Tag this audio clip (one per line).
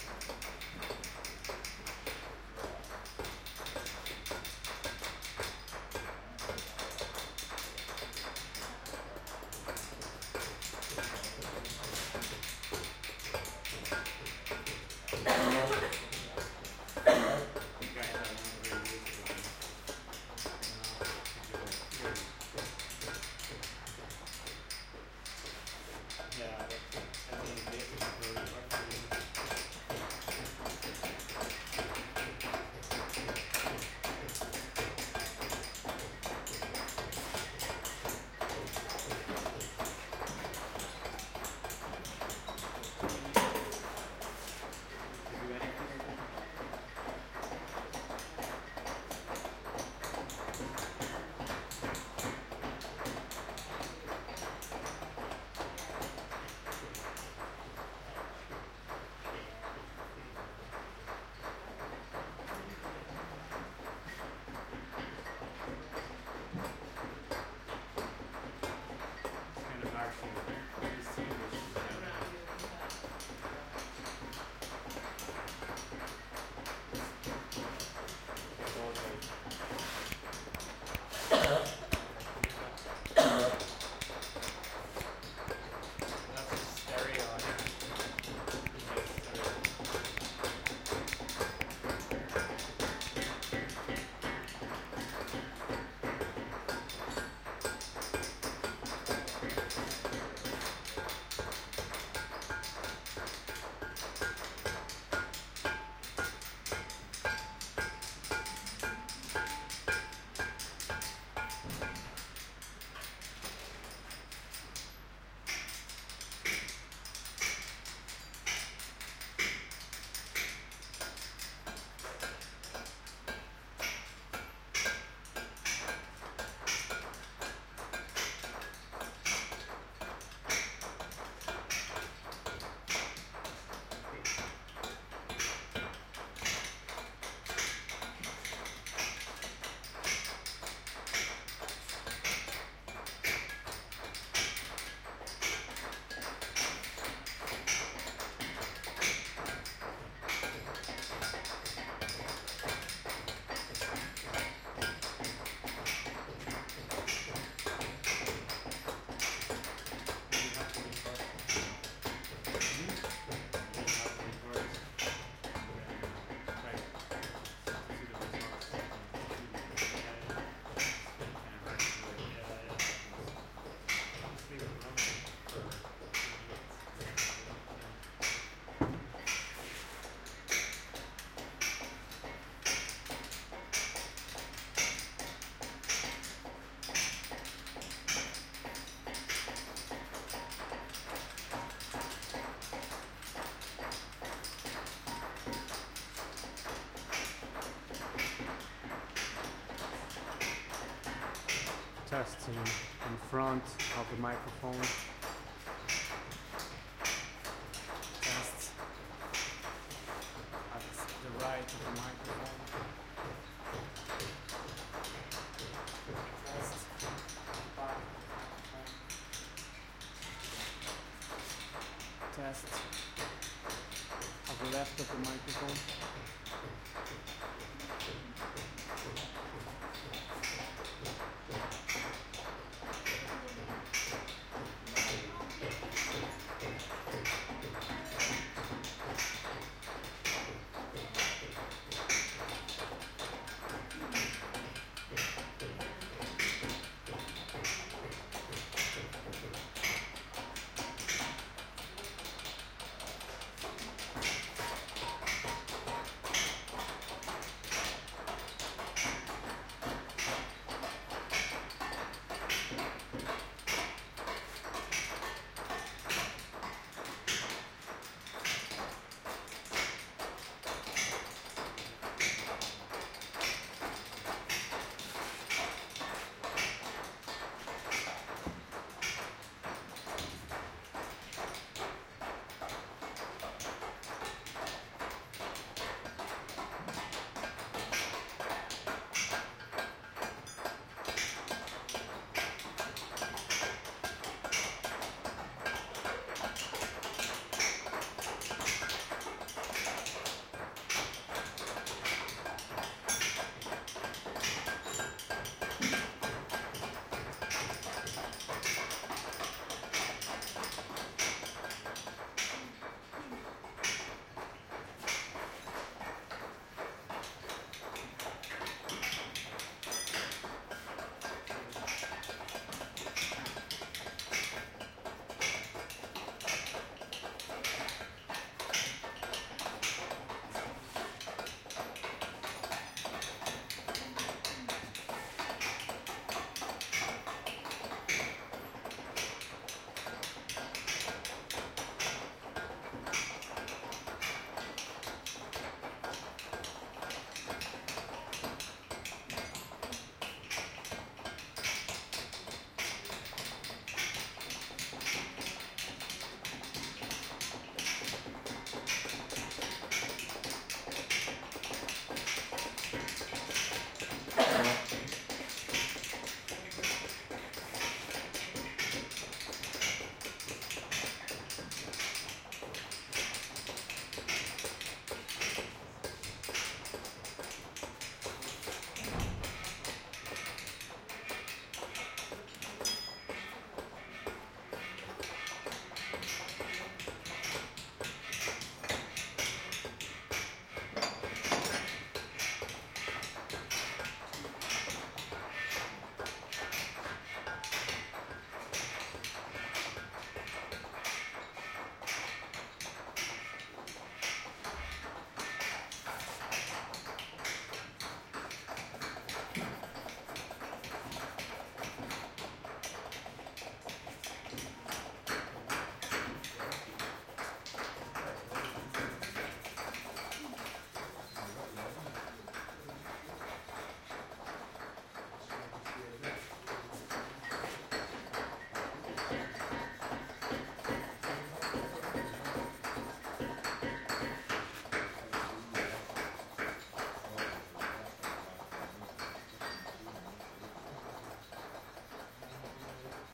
stonework
stonemason
tools